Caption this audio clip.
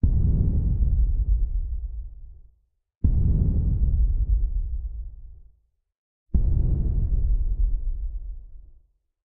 Scary Foley
Three high quality foley sounds of a low pitched echoing drum for suspense. For those who have played the game Slender Man, they just might ring a bell.
These were made by using a sample of a drum and adding Heavy Low Pass and Adobe Soundbooth's Echo and Dream Sequence effect.
dark, drum, fear, foley, horror, man, scary, slender, suspense